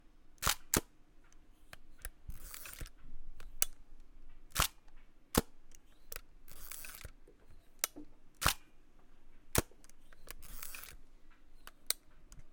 hasselblad 500cm shutter
Shooting and winding a Hasselblad 500cm camera.
bluemoon, camera, click, foley, hasselblad, historic, machine, photography, raw, sample, shutter, sound-museum, whirr